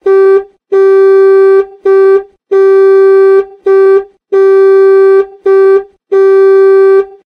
Car Honk MUS 152
horn, car-horn, beep, mus152, car-honk, car, honk